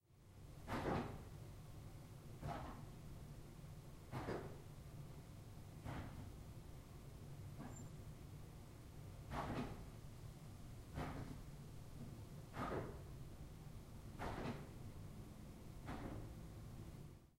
Raw audio of multiple electric church organ stops being depressed at the same time, and the subtle mechanical sounds that occur as a result.
An example of how you might credit is by putting this in the description/credits:
The sound was recorded using a "H1 Zoom V2 recorder" on 8th December 2015.